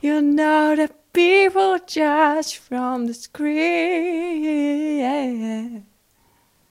Female Voc txt You know the people just from the screen
Some short pieces of never released song
lyrics, voice, female